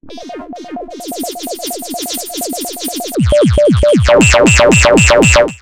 Alien Message Received
When I was alone in my room late at night I received this message on my laptop...